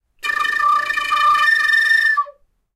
Tin Whistle, Flutter, A (H1)
Raw audio of flutter tonguing (ish) on a Celtic tin whistle. I recorded this simultaneously with the Zoom H1 and Zoom H4n Pro recorders to compare their quality.
An example of how you might credit is by putting this in the description/credits:
The sound was recorded using a "H1 Zoom recorder" on 31st October 2017.
flute, flutter, fluttertongue, tin, tongue, tonguing, whistle, woodwind